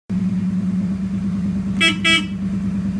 J1 Car Horn
hitting a classic car horn
car, classic